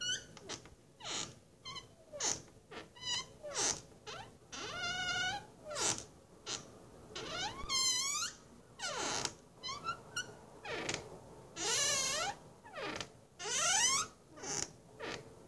Wooden Door Squeaks
A collection of sounds from my squeaky bedroom door that I recorded.